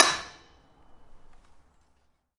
Hitting an anvil 2
Recorded with a Sony PCM-D50.
Hitting a metal object. Like an anvil, it's not really an anvil.